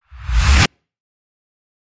woosh fx 2
woosh sfx sound, usefull for video transition. from several sample that i processed in ableton live.